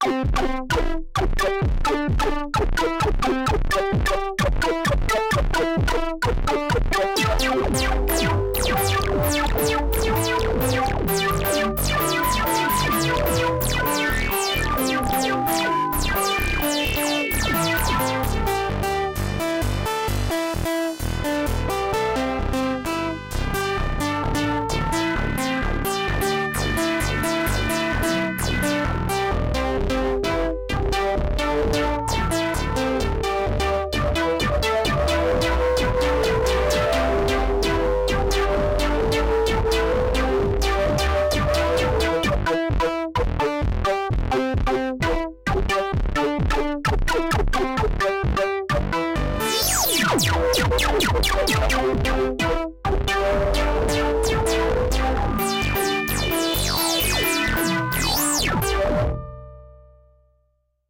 Nord keys 4 Bar 130 1 Minute
Nord Lead 2 - 2nd Dump
ambient, backdrop, background, bass, bleep, blip, dirty, electro, glitch, idm, melody, nord, resonant, rythm, soundscape, tonal